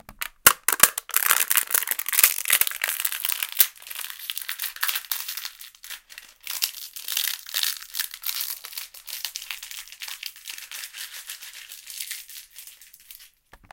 A plastic cup smashed. Recorded with a R09 stereo recorder
smash, kitchen